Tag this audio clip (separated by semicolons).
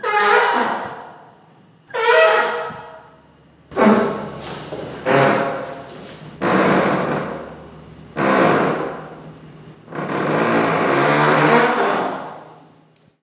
creak; door; open; creaking; squeak; hinge; creaky